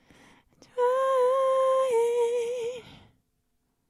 Female Voice Doodling (14)
vocal, female, improvisation, voice